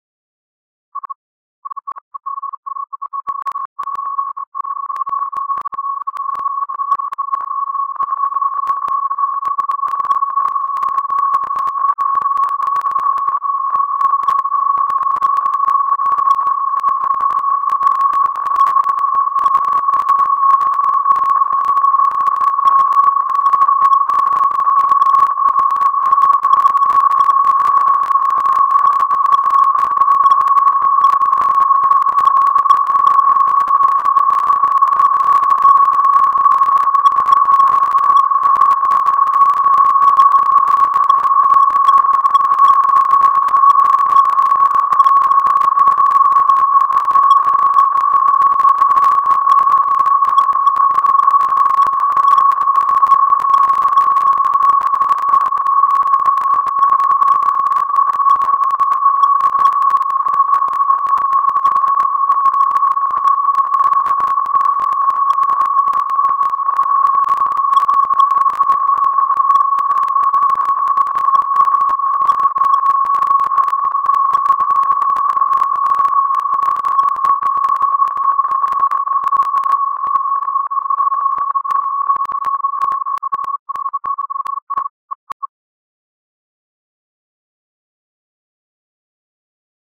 population noise 1
Like the tags say: blips and pops in a very reverby space. It is made with Pd.